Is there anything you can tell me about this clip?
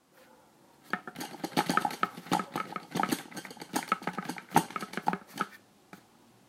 objects shaking on wooden table